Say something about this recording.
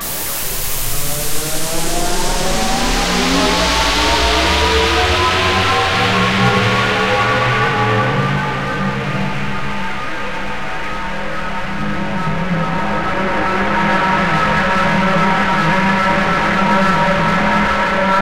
long rise
techno, noise